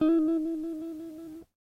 Lo-fi tape samples at your disposal.